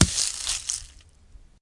rcok falls on dry leafs
rock falls on dry leafs
crisp, dry-leafs, hit, leafs, rock